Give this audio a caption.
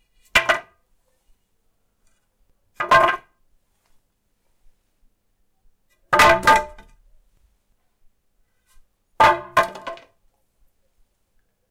Me dropping a wooden batten on my driveway at various heights. I did it around 21:30 so there would be no traffic or bird noises etc. Nice clean sound.
If not, that's fine ๐Ÿ˜Š
The more the merrier. Thanks